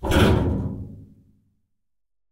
Metal Object Movement
Moving a large metal object. Can't remember what it was, think it was an oil drum.